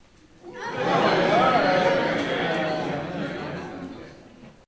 Audience Murmur of Agreement
Recorded with Sony HXR-MC50U Camcorder with an audience of about 40.